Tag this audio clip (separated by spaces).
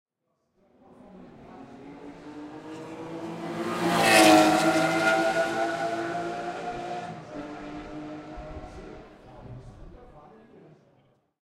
engine zoomh4 car sound accelerating field-recording